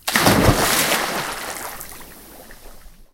Splash, Jumping, D
Raw audio of someone jumping into a swimming pool.
An example of how you might credit is by putting this in the description/credits:
The sound was recorded using a "H1 Zoom recorder" on 28th July 2016.